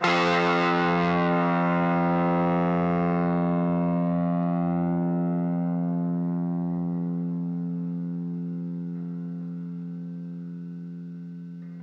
Two octaves of guitar power chords from an Orange MicroCrush miniature guitar amp. There are two takes for each octave's chord.
power-chords, amp, guitar, distortion